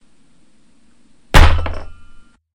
I punched my table and made this sample